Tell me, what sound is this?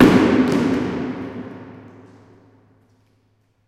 plonk plonk
One of a pack of sounds, recorded in an abandoned industrial complex.
Recorded with a Zoom H2.